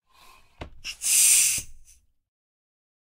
A bicycle pump recorded with a Zoom H6 and a Beyerdynamic MC740.